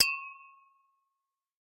Common tumbler-style drinking glasses being tapped together. Resonates nicely with a ping. Close miked with Rode NT-5s in X-Y configuration. Trimmed, DC removed, and normalized to -6 dB.
glass, resonant, tap, tumbler